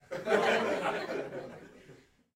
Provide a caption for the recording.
Recorded inside with about 15 people.